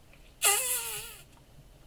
i randomly made a noise whit my mouth that sound like a silent fart while working on audacity. Randomness do bring new content